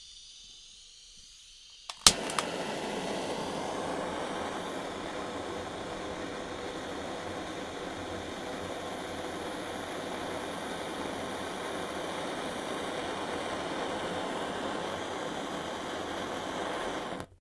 Gas burner 01
Running small gas burner.
gas, burning, gas-burner, burn, burner, fire, flames, flame